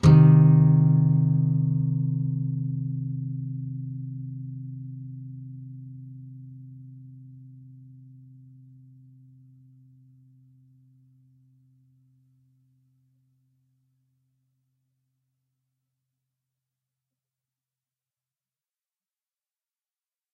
C minor. E (6th) string 8th fret, A (5th) string 6th fret. If any of these samples have any errors or faults, please tell me.